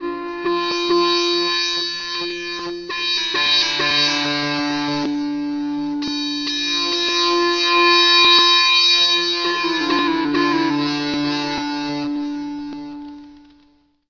QUILTY - Bonechillin' Pads 002
I forgot about these samples, and they were just sitting in the FTP until one day I found them. I erased the hard copies long ago, so I can't describe them... I suppose, as their titles say, they are pads.